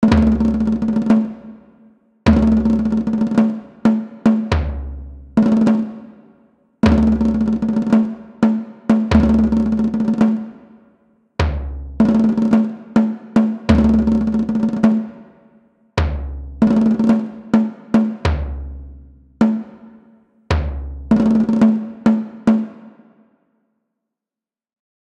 Beat20 (105bpm)
A sampled beat, loopble in 105 bmp.
From the lovely Beataholic pack for your downloading pleasure.
beataholic, drum, drum-loop, drums, improvised, loop, pack, percs, percussion, percussion-loop, percussive